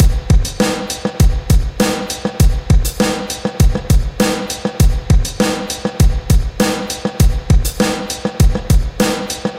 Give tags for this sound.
breakbeat; drumloop; beat; downtempo; drum; loop

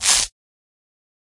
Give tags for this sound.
fuerte; golpe; sonido

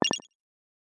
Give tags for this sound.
effects; Sound

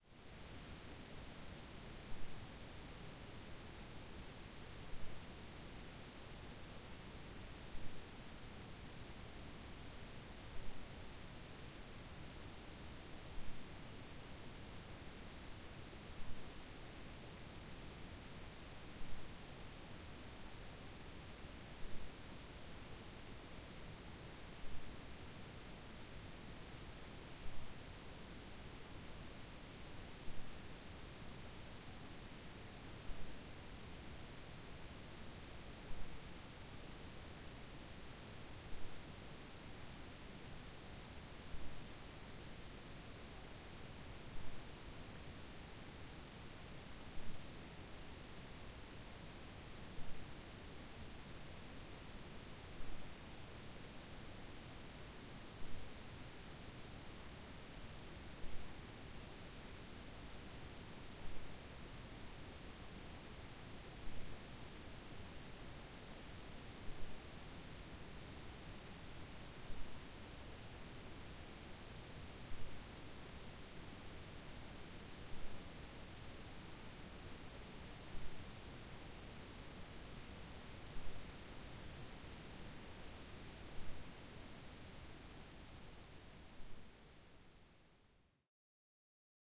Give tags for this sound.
Atmos; australia; crickets; desert; evening; field-recording; insects; nature; night

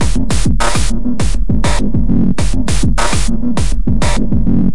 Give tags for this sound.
101bpm; beat; cheap; distortion; drum; drum-loop; drums; engineering; loop; machine; Monday; mxr; operator; percussion-loop; PO-12; pocket; rhythm; teenage

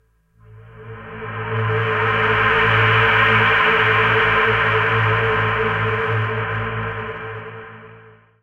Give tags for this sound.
synth; stereo; swell; ambient

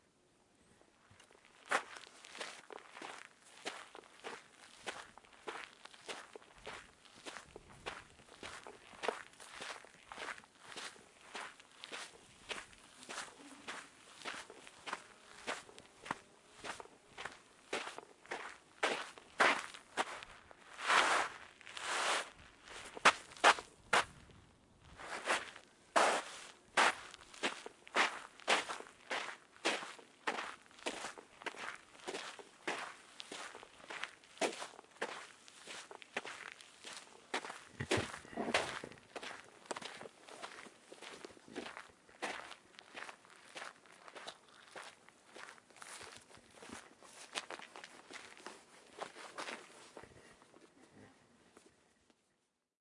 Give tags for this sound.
walking; Sevilla; Alanis; birds; grabacion-de-campo; pajaros; Espana; pasos; field-recording; footsteps; nature; andando; Spain; Castle

